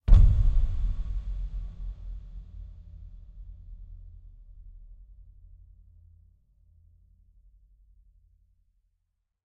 Deep Impact
Impact SFX
Enjoy!
ending, trailer, drum, dark